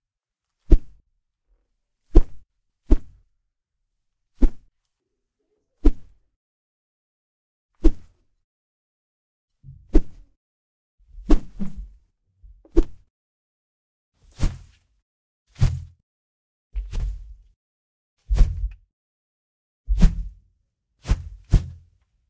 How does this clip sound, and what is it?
Sons produzidos pela movimentação rápida de uma baqueta de bateria e de um cabo de vassoura, captados por um Neumann TLM103(Condensador, Cardioide); pertencente à categoria de Sons Humanos, de acordo com a metodologia de Murray Schafer, dentro do tema de sons de combate ou luta.
Gravado para a disciplina de Captação e Edição de Áudio do curso Rádio, TV e Internet, Universidade Anhembi Morumbi. São Paulo-SP. Brasil.